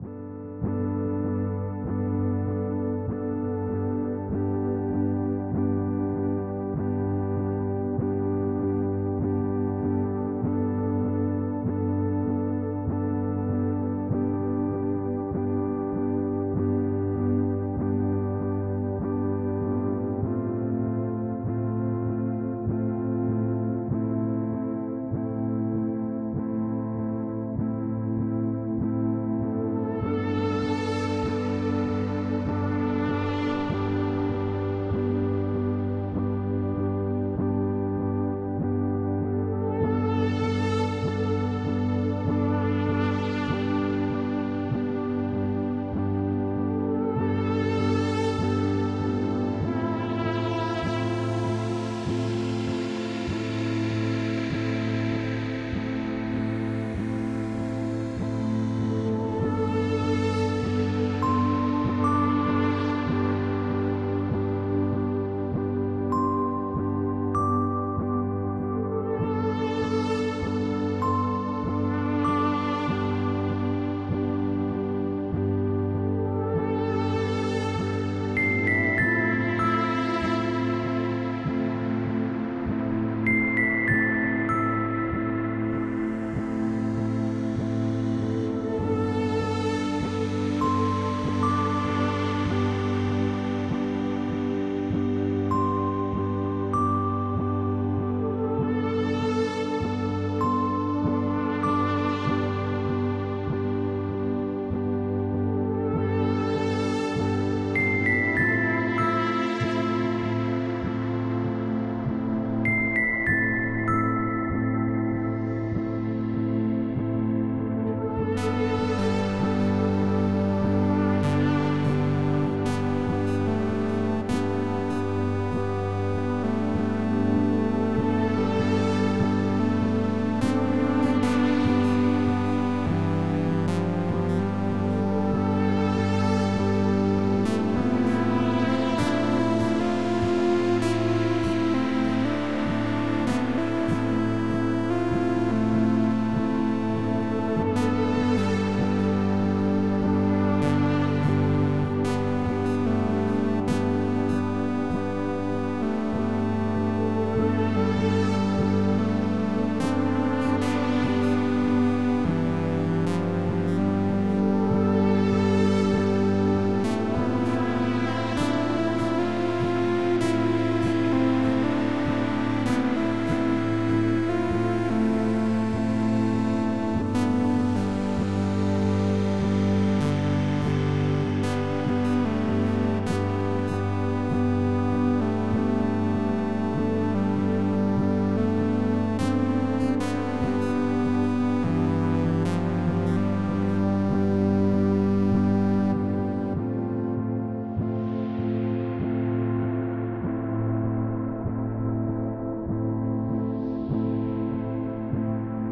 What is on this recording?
Orange treasure - experimental electronic music.